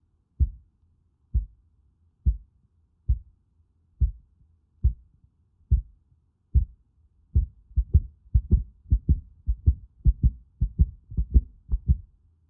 This is the sound of a heart beating. The heart beats slow at the beginning but speeds up at the end of this audio track. This sound was created by the use of a towel and it was edited in Reaper.